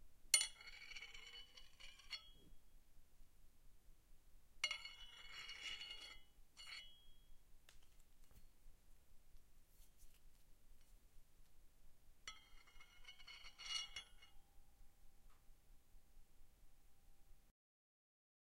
I recorded my Sai to get a variety of metal impacts, tones, rings, clangs and scrapes.